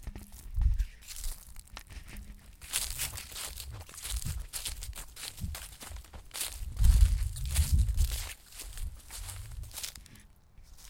The sound of someone walking through Autumn leaves scattered on the ground.
Dry-Leaves, Fall, Footsteps, Autumn, Leaves